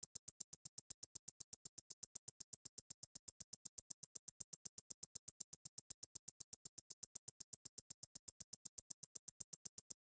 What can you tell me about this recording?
tick-tock between seconds of a wristwatch.